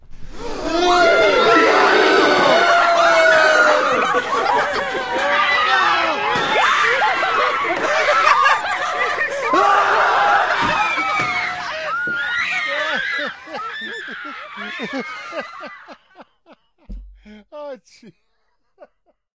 Screaming Crowd
A small crowd in a carnival show screams in happy surprise at a "scare".
crowd, laugh, surprise